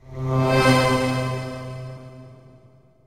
sax attack 4
sax band filtered sample remix
attack, sax, transformation